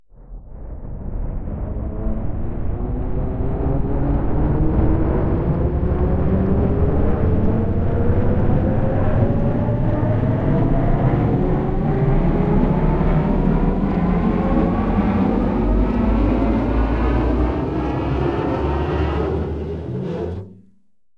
Abstract Spaceship A01, Ascending
Audio from my new sound effects library - "Abstract Spaceships" - with various spaceship sounds created without using jet plane recordings. Several metal tins were scraped with a violin bow, combined together, and pitch shifted to create this ascending spacecraft sound.
An example of how you might credit is by putting this in the description/credits:
The sound was recorded using a "H6 (XY) Zoom recorder" on 27th January 2018.